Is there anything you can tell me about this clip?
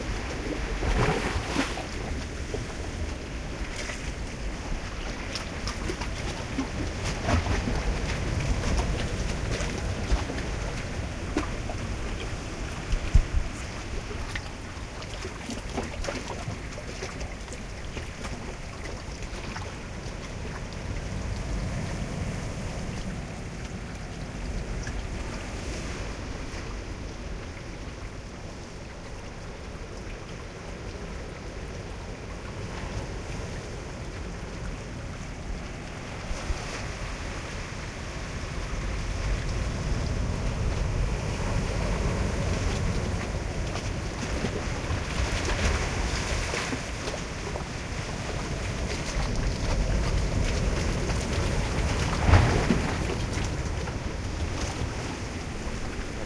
Sea Gurgles
Recording of the sea bashing into rocks at the shore into a little cave system. Used a 4 channel recording set up with two in-ear binaural microphones by Soundman and a Zoom H4n